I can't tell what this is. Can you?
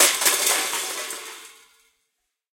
COKE CAN CHAOS C42 001
There were about twenty coke cans, four plastic cups, a garbage pail and an empty Culligan water bottle. These were arranged in various configurations and then kicked, dropped, smashed, crushed or otherwise mutilated. The sources were recorded with four Josephson microphones — two C42s and two C617s — directly to Pro Tools through NPNG preamps. Final edits were performed in Cool Edit Pro. The C42s are directional and these recordings have been left 'as is'. However most of the omnidirectional C617 tracks have been slowed down to half speed to give a much bigger sound. Recorded by Zach Greenhorn and Reid Andreae at Pulsworks Audio Arts.
bin, bottle, c42, c617, can, chaos, coke, container, crash, crush, cup, destroy, destruction, dispose, drop, empty, garbage, half, hit, impact, josephson, metal, metallic, npng, pail, plastic, rubbish, smash, speed, thud